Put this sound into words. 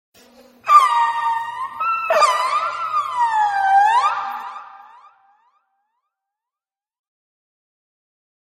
its free willy
fish, orca, whale, free, wale, killer, willy